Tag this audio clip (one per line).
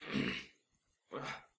human
strain
straining
stretch